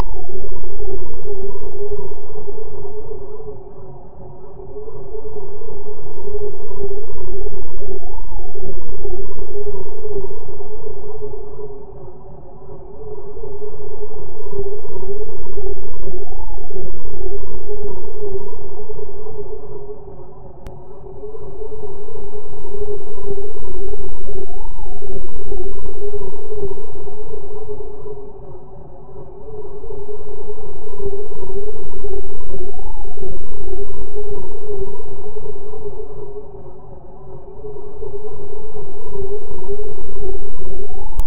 Modulated sinus wave illustrates a sound rolling coaster